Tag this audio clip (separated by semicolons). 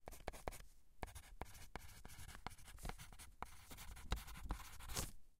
graphite; paper; pencil; right-to-left; rustle; scratch; scratching; scribble; stereo; wide; write; writing; written